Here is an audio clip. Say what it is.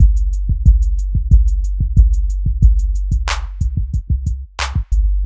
Basic hip hop loop 2
thump, hip-hop, hip, road, hop, low, loop, bass, rd, kick